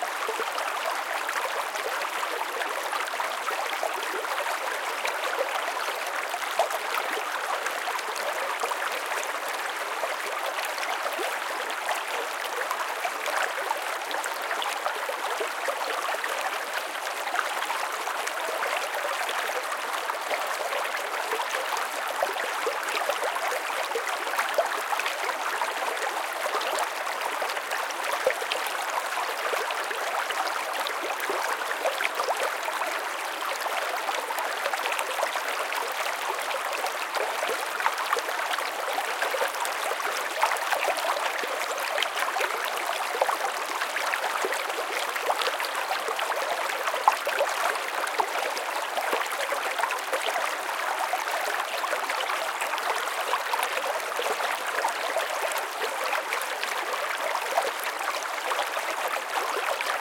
Small River 1 - Medium fast - Close
Collection of 3 places of a smaller river, sorted from slow/quiet to fast/loud.
each spot has 3 perspectives: close, semi close, and distant.
recorded with the M/S capsule of a Zoom H6, so it is mono compatible.
perspectives, river, stream, water, water-flowing